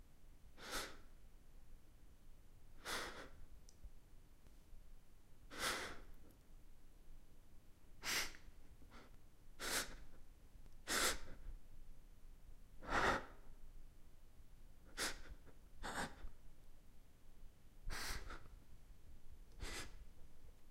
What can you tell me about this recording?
fear breath
I breathe (partially directly into the microphone o_O)
This recording was made with a Zoom H2.
game, recording, zoom, breath, air, bethewumpus, zoom-h2, lungs, h2